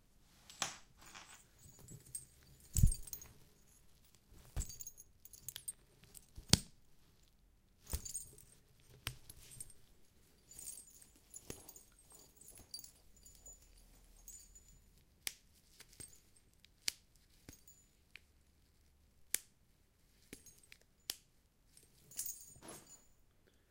Snap-fasteners08
Here I tried to collect all the snap fasteners that I found at home. Most of them on jackets, one handbag with jangling balls and some snow pants.
botton, click, clothing-and-accessories, snap-fasteners